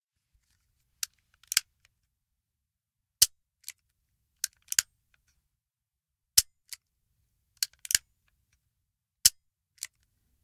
A gun being cocked and the trigger being pulled. No gun shot sound